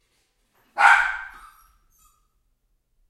bark yelp dog small int
bark, dog, int, small, yelp